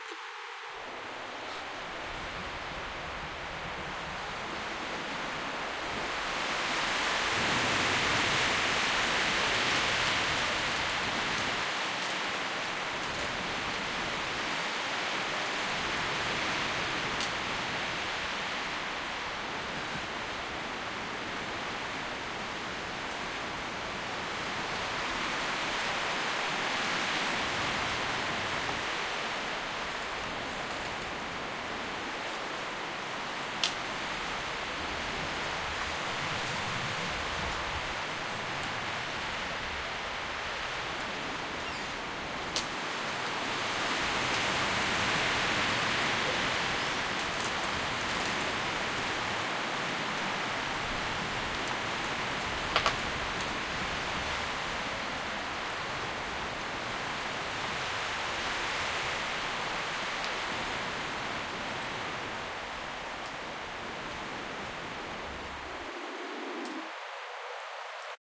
Wind Through Trees 1
Recorded on a windy day in Thrunton woods, Northumberland, UK.
Zoom h2n, recorded near a tree that was falling over and rubbing against other trees.
You can hear:
- Wind
- Wood creaking and Squeaking
- Leaves rustling
windy, creak, rustle, Field-Recording, wood, Northumberland, branches, Thrunton